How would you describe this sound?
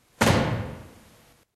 Stairway Down
When the moving stairs hit the stage, I recorded the actual hit and enhanced it with some border Mics mounted on the stairway. I added some echo to make it sound more impressive. I had the sound system programmed to stop the chain sounds as part of this cue.
Stage, Effect, Hitting, ManOfLaMancha, ZoomH2n, Stairway, Echo